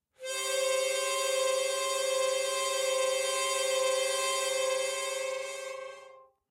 Chromatic Harmonica 5
A chromatic harmonica recorded in mono with my AKG C214 on my stairs.
harmonica, chromatic